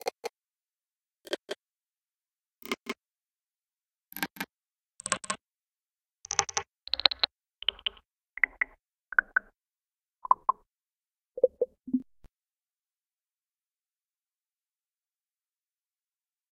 a selection of several pitched and lfo clicks.
clicks, buttons, pitch